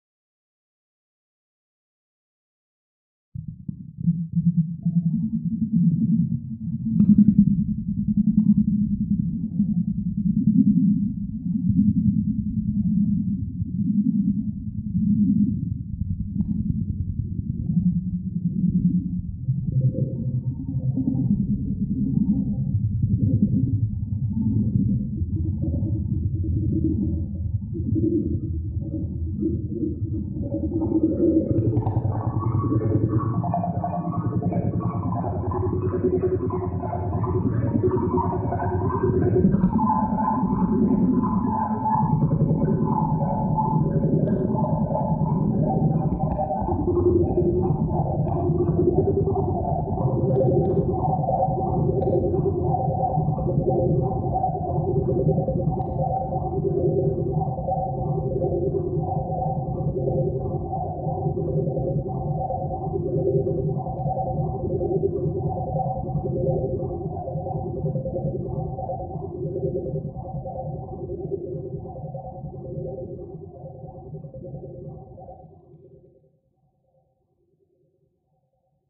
Sound created for the Earth+Wind+Fire+Water contest.
Starting from NASA ozone monitoring data feeding a custom "Bidule" instrument.
The sound wanted is an abstraction of the earth, it can be seen as the resonance in the universe of the earth crying and screaming.
It was finalized in pro-tools using flanger, envelope filter, compressor and others effects.
Earth = cry of the ozone hole.
bidule, competition, contest, earth, nasa, space, synthetic